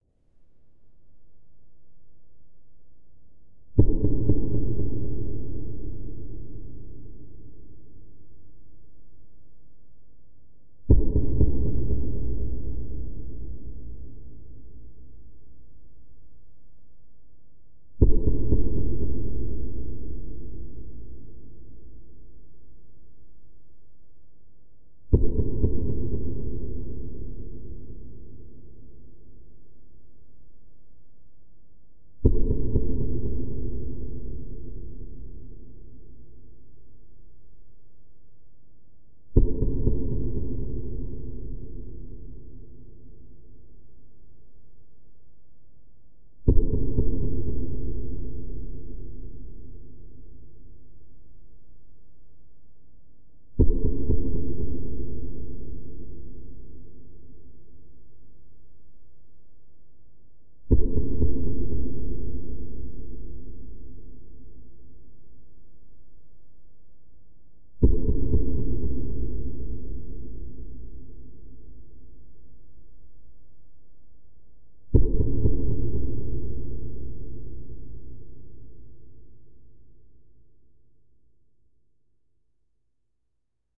High Tension One Beat Sequence
A percussive sound effect created using a Samson USB Studio microphone, an empty cardboard wrapping paper roll, and Mixcraft 5.
Percussion, sound-effect